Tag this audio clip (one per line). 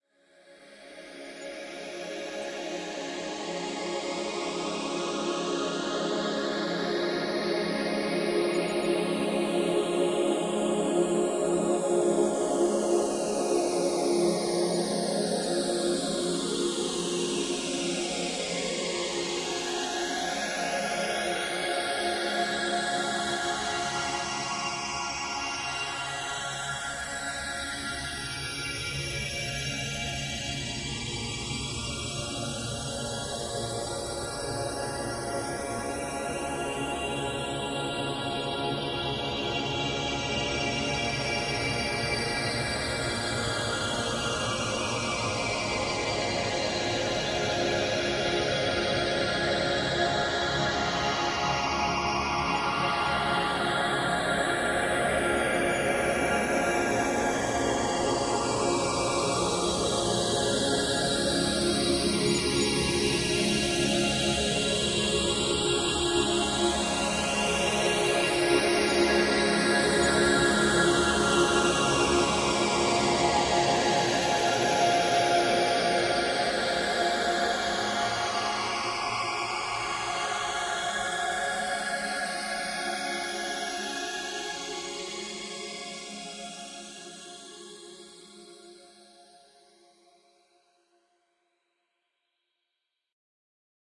atmospheric
blurred
convolution
emotion
ethereal
floating
headphone-enhanced
phasing
synthetic-atmospheres